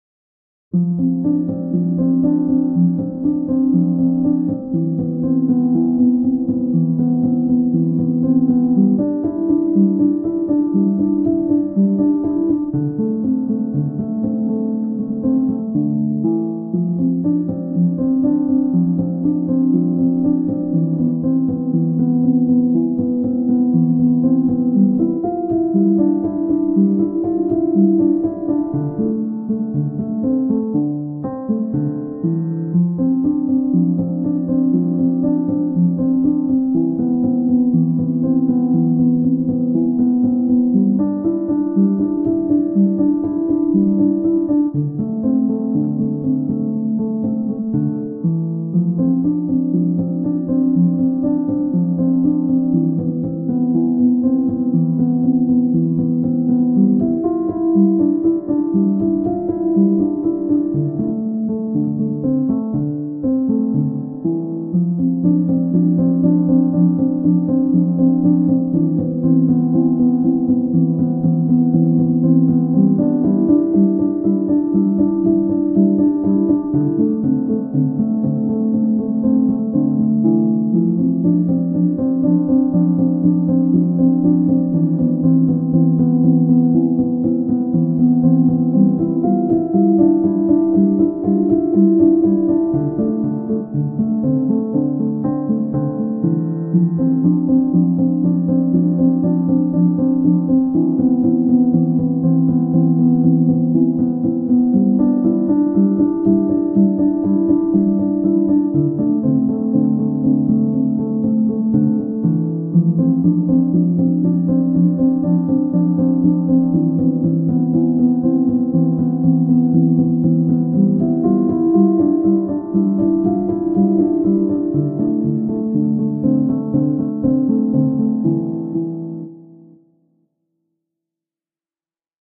Some evening piano loop I've recorded recently.